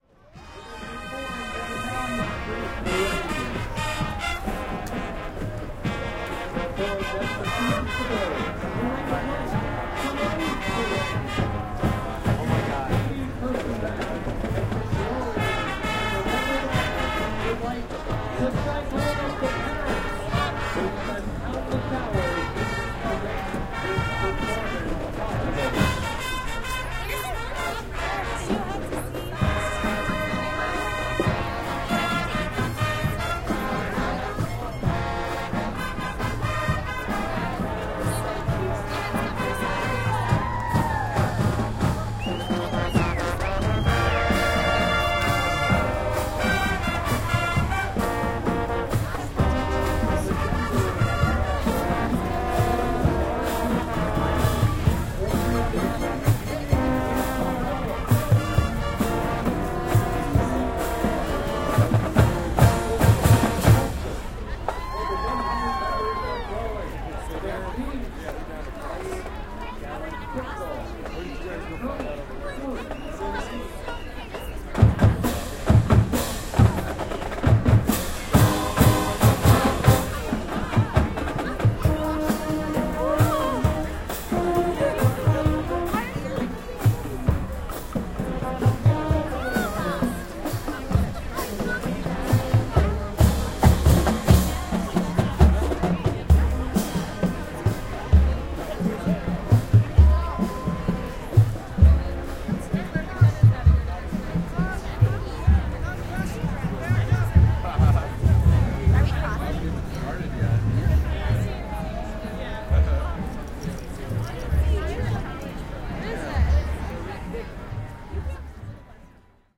Recorded at the Denver St. Patrick's Day Parade with Sonic Studios DSM-6 microphones into a Sony PCM-M10.
parade, marching